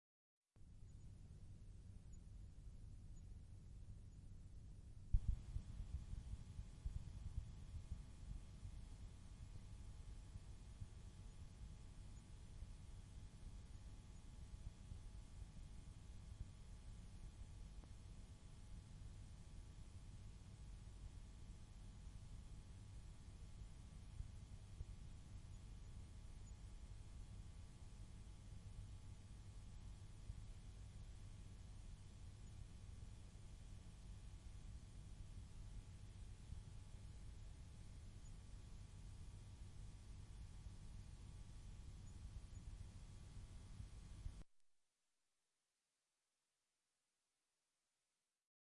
Tape Hiss from Blank Tape - Dolby C-NR
Recording tape hiss from a blank tape with Denon DN-720R tape recorder and player with Focusrite Scarlett 2i4.
tape, lofi, noise, vintage, lo-fi, hiss